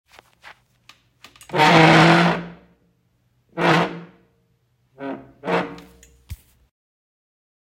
moving a chair out
Taking out a chair aggressively
chair, move, OWI, screeching, sit, sitting